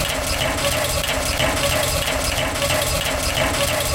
a small loop of a "machine" sound.
electronic, glitch, glitchy, machines, mono, noisey